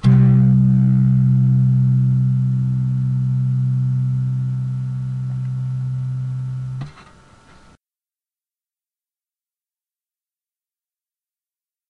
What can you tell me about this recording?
acoustic guitar lofi
lofi, acoustic, guitar